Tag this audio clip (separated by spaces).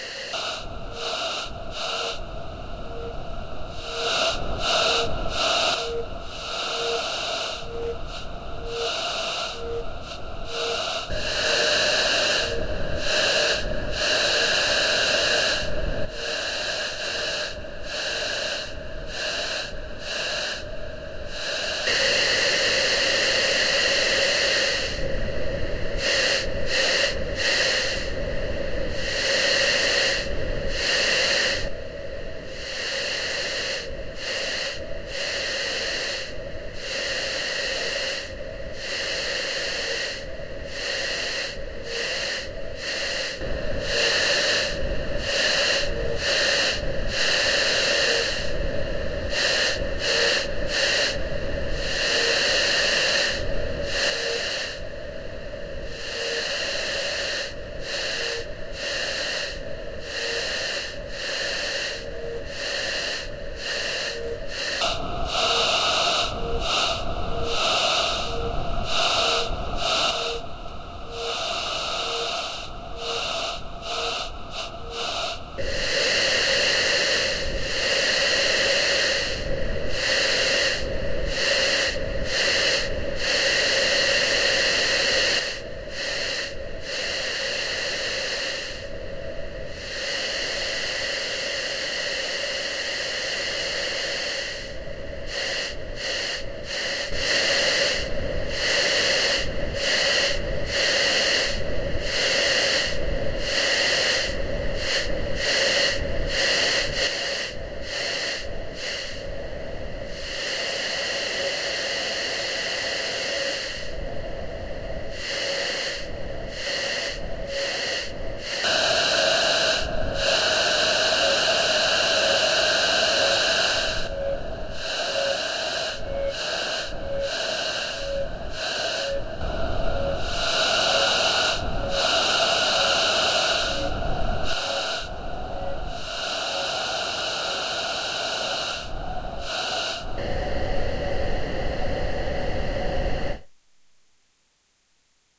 ghost alien synthesized-voices sound-effect processed wind electronic experimental